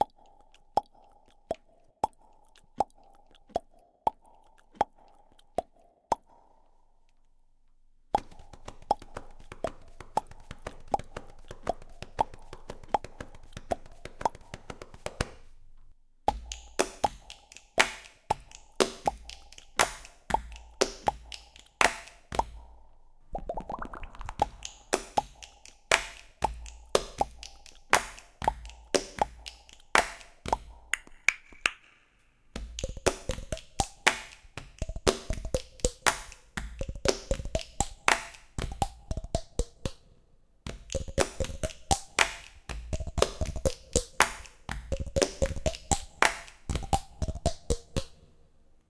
Body percussion rhythm made by lips, torax, snap fingers, thighs, mute and bright palms, palm on the cheek and click tongue. Recorded with RODE NT1-A condenser microphone.